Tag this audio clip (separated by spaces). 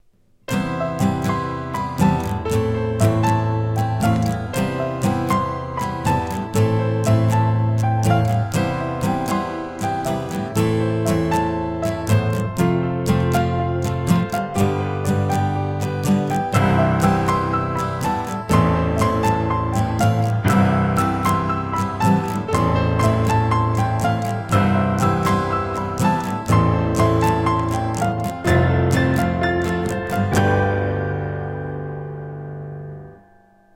emotional piano song sad guitar existence